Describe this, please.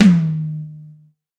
hiccup tom 1
hiccup toms are my basic DW tom series, with a +6 semitone range of smooth pitch WaveLab bending only at mid-attack. If you want to read details of the original DW recording, visit my DW tom description of the original samples uploaded on this site.
drum, hi, kit, tom